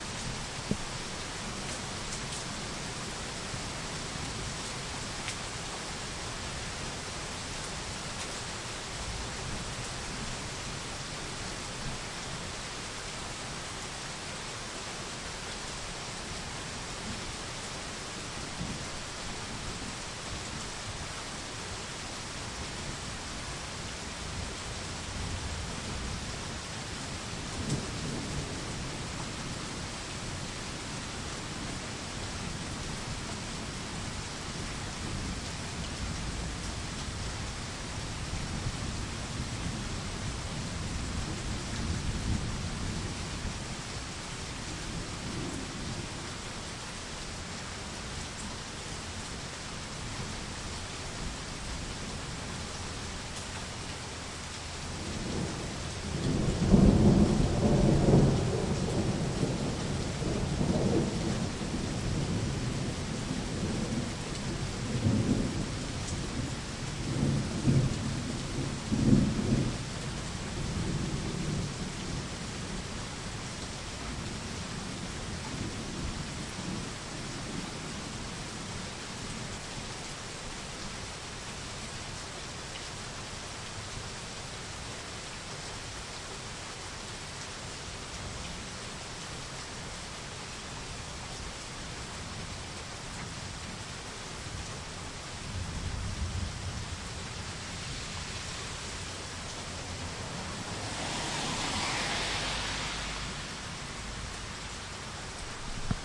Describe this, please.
Heavy Rain - Front Porch - 2
Rain/thunderstorm recorded from front porch. Heavy rain.
Recorded with Zoom H2.
Rain, Storm, Thunderstorm, Weather